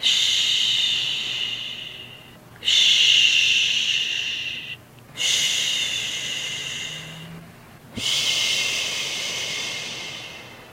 woman sexy shhh
Woman whispering shhhh 4 separate times. Long slow shhhh.
Woman, coffee, hot, naughty, sexy, shhh, shush, steam, whispering